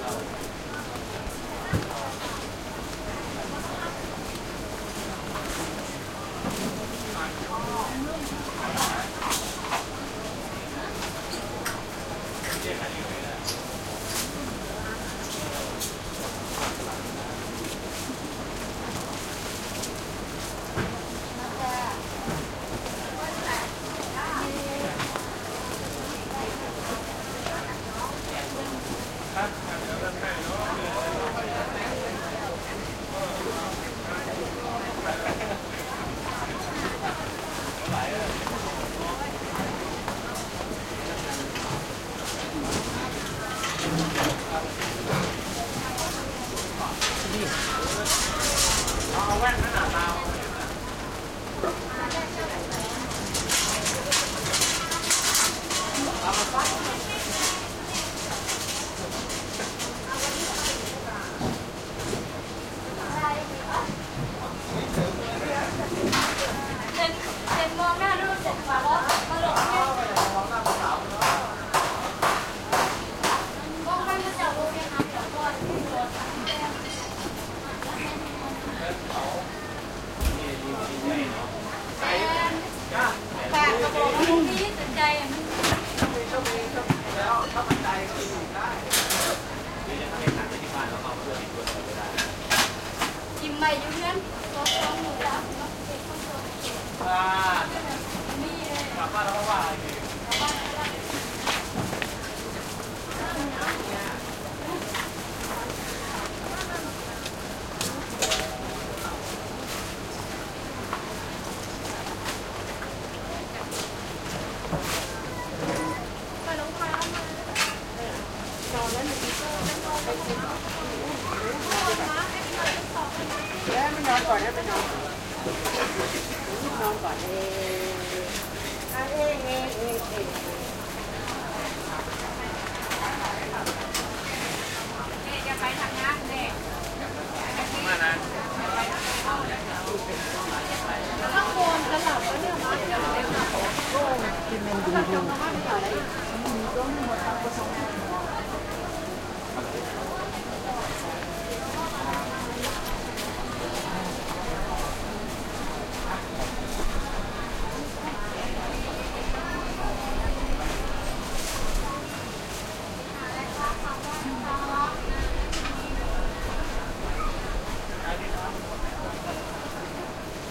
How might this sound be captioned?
Thailand Bangkok, Chinatown alley busy voices wok cooking metal carts pushed by
field-recording, cooking, Chinatown, busy, alley, Thailand, Bangkok